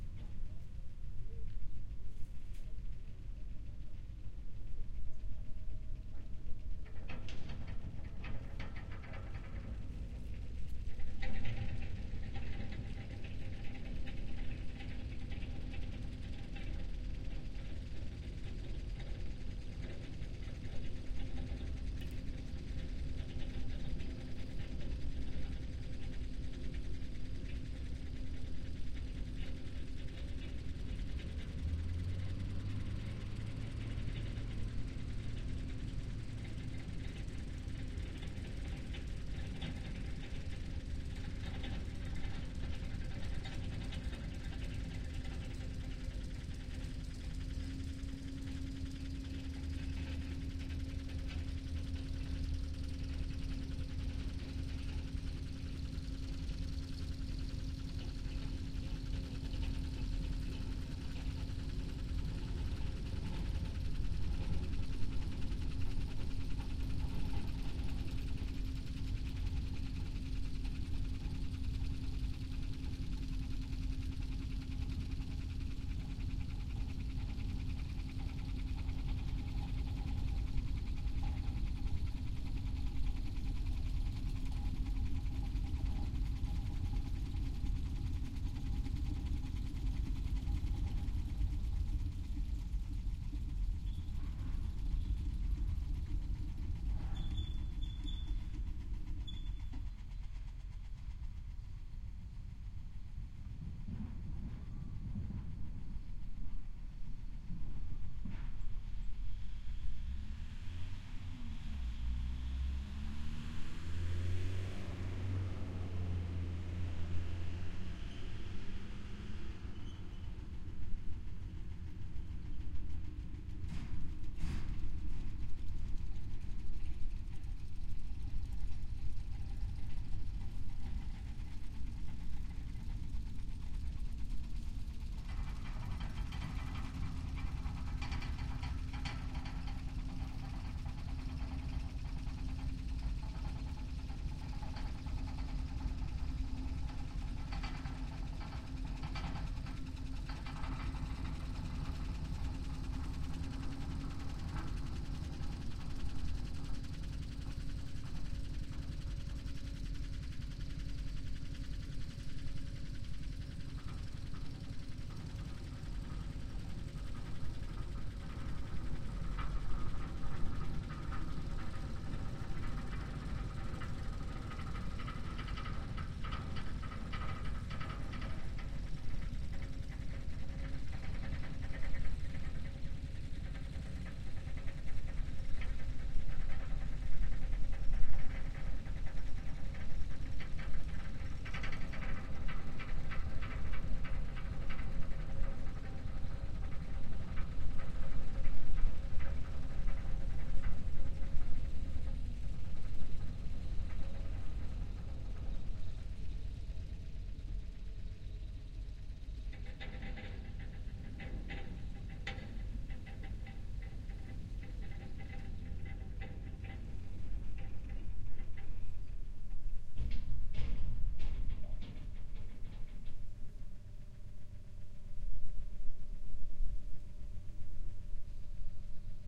chain ferry
Rothenburg is a village in the Saalekreis district, Saxony-Anhalt, Germany. The Rothenburg Ferry, a cable ferry, crosses the Saale river at Rothenburg. Here is a recording of the sound it made. Primo EM172 into PCM-D50.